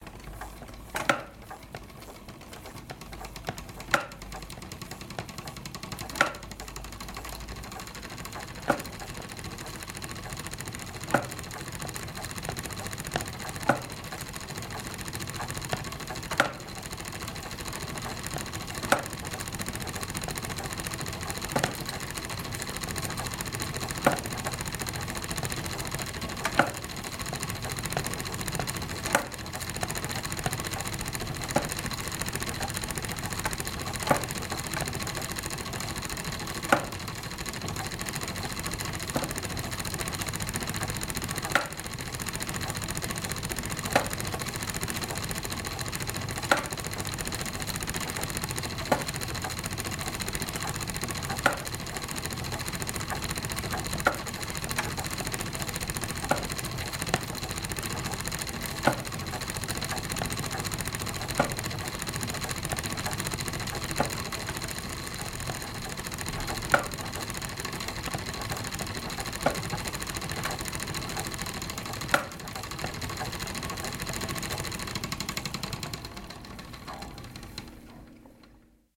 spinning wheel (kolovrat)
pedal, spinning, wheel, old